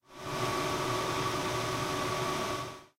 printer standby
Sound of printer on stand by in library.
Recorded at the comunication campus of the UPF, Barcelona, Spain; in library's second floor, next to group cabins.
library, stand-by, printer, printer-powered-on, UPF-CS12, campus-upf